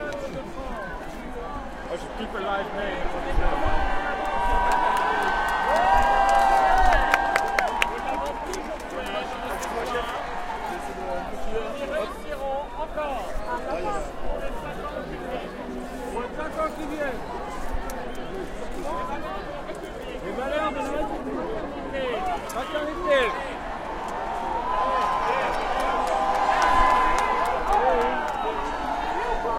french presidential elections 2012
6 may 2012.
French presidential elections in Paris,
Place de la Bastille.
elections, paris